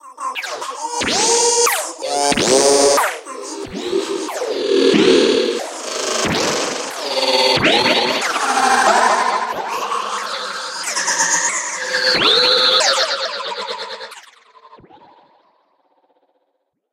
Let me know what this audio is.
abstact grainy voicebox
abstract, lab, mutant, soundesign